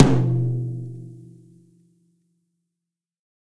SRBD RTOM1 001
Drum kit tom-toms sampled and processed. Source was captured with Audio Technica ATM250 through Millennia Media HV-3D preamp and Drawmer compression. These SRBD toms are heavily squashed and mixed with samples to give more harmonic movement to the sound.
drum, drums, kit, real, sample, tom, toms